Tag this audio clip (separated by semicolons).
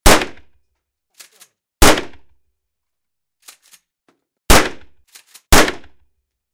fire; gun; shot; shotgun; weapon